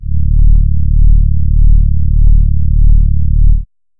An analog synth horn with a warm, friendly feel to it. This is the note D in the 1st octave. (Created with AudioSauna.)